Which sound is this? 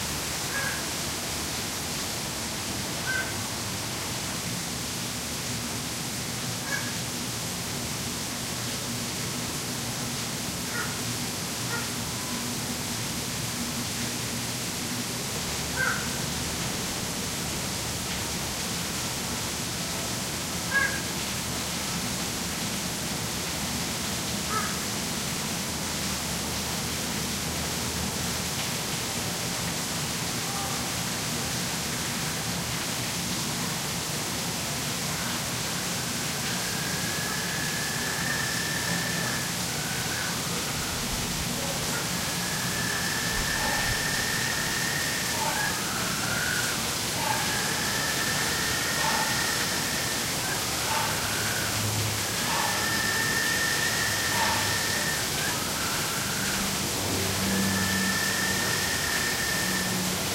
This is a recording of Australian Little Penguins calling. There is also a waterfall. Recorded with a Zoom H2.
australia, call, field-recording, penguin, water, waterfall, zoo